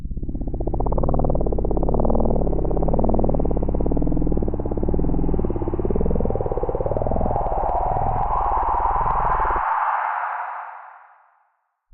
FX sound grgoyl
Digital manipulation of a sampled bass synth with pitch lfo. sampling, multiband processing middle frequencies, saturation of low frequencing, filtering and compressing
pad, reverb, bass, sound, sounddesign, sfx, soundeffect, sci, sci-fi, uplifting, fx, digital, sound-design, effect, multiband-processing, dark, synth